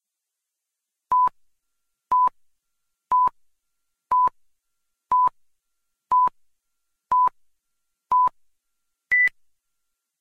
Classic 'pre-movie countdown' beeps sound effect.
beeps, countdown, high, movie, pitch